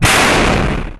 xm360 canon shot 1 no echo
military, tank, war, schuss, projectile, army, caliber, shot, explosion, canon, attack, agression, fight